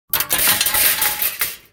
Recorded for a bicycle crash scene. Made by dropping various pieces of metal on asphalt and combining the sounds. Full length recording available in same pack - named "Bike Crash MEDLEY"